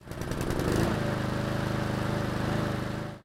Vehicle engine ignition
car, car-engine-ignittion, car-engine-start